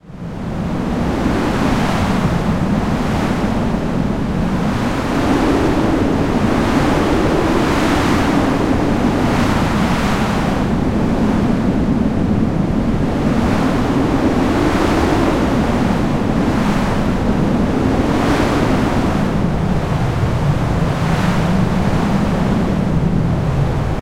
CP Whipping Wind Storm Medium01
This a slightly mellower synthetic recreation of a wind storm.